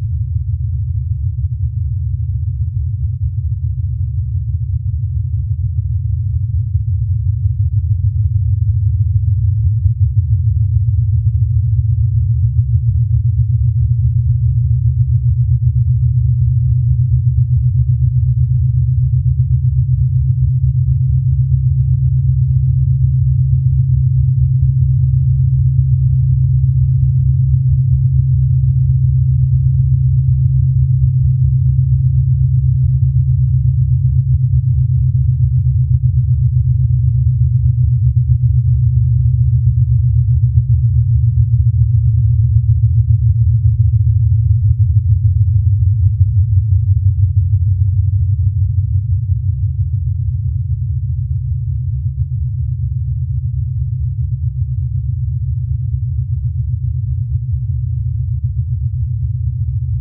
IMG 4343 1kl
the sample is created out of an image from a place in vienna
image, synthesized, Thalamus-Lab, processed